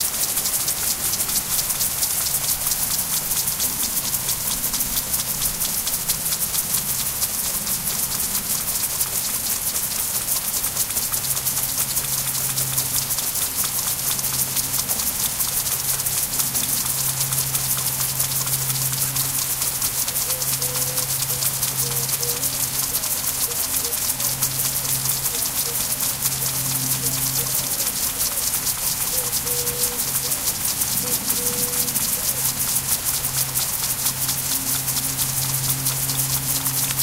Impact Sprinklers on Potatoes
Impact sprinklers irrigating .6 m tall potato plants. This is a field recording taken in Idaho, USA, on July 20, 2021. Recorded with Tascam DR-05X.
potato-irrigation, sprinkler, impact-sprinklers-on-potatoes, field-recording, irrigation, impact-sprinkler